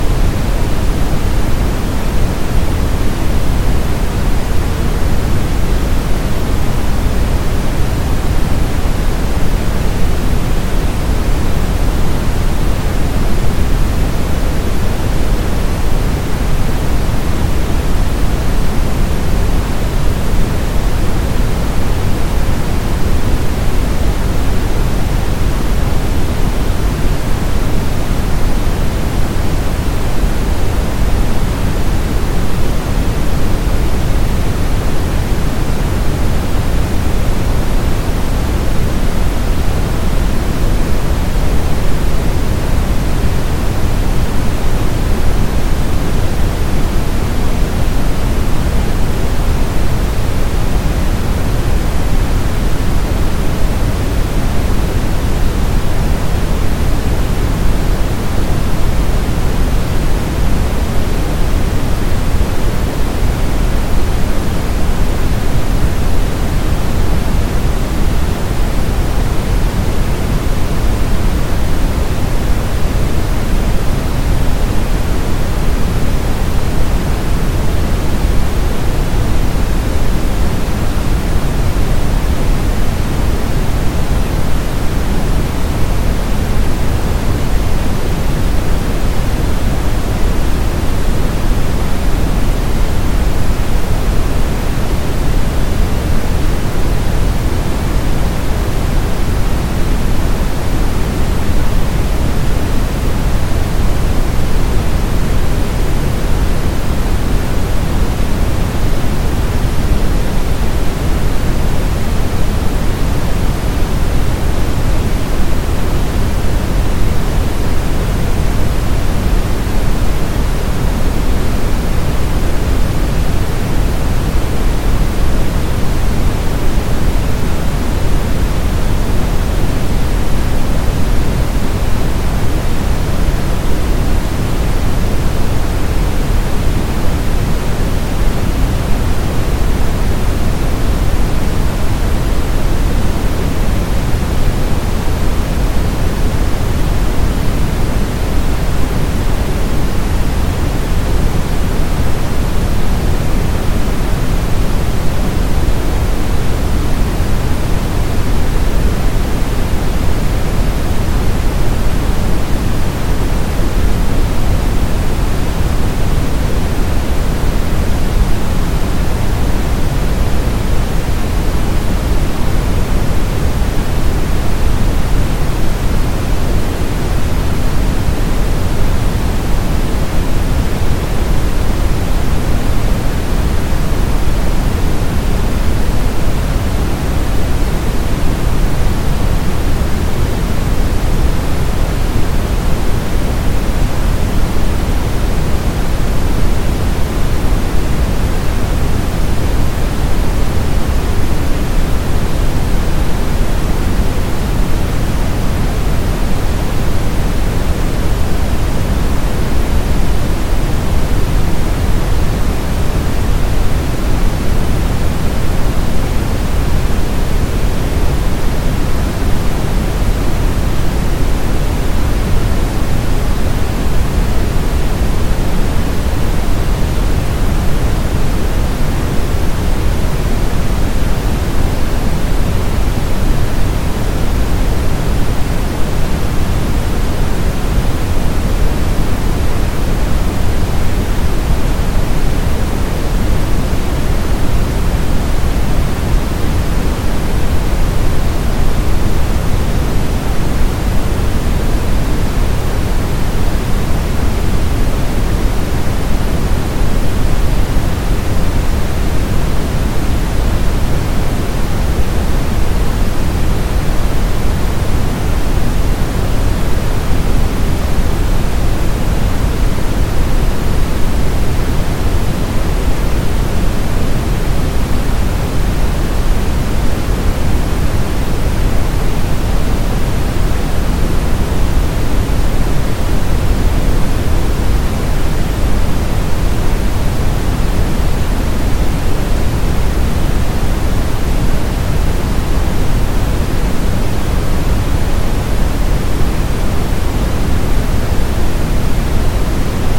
Waterfall 300 Sec, Half Size
Waterfall (designed / synthesized).